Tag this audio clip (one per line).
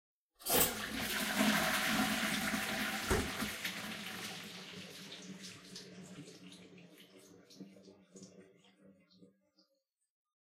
toilet
flush
bathroom
water